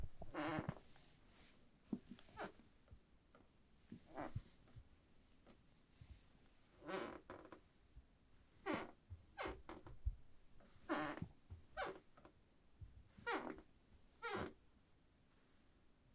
Floor Creak
Creak of the floor when it is stepped on.
floor, wood, creak